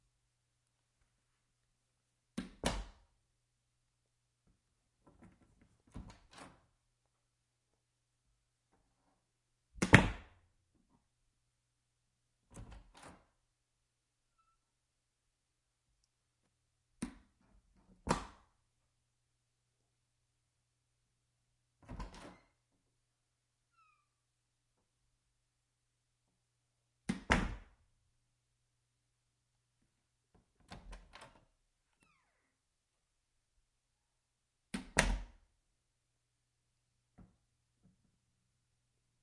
Opening closing pantry door
Various takes of opening, and closing a small pantry door in a kitchen. It squeaks sometimes.